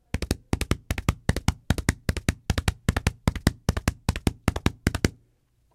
Humano batendo punhos em peito com intuito de parecer galope de cavalo. Captado
com microfone condensador cardioide em estúdio.
Gravado para a disciplina de Captação e Edição de Áudio do curso Rádio, TV e Internet, Universidade Anhembi Morumbi. São Paulo-SP. Brasil.
animal, cavalo, natureza, galope, trote